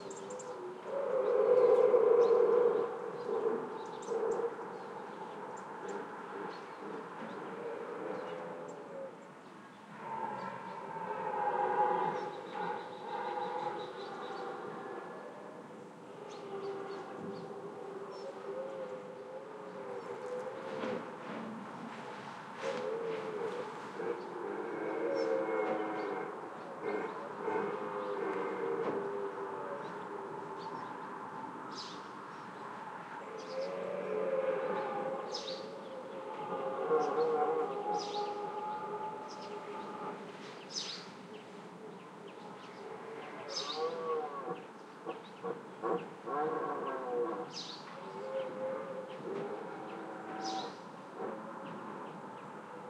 Deer rut - Brame Cerf
OKM II binaural capsules
ZoomH5
Senheiser MKE600
cerfs, animals, ambient, deer, field-recording, nature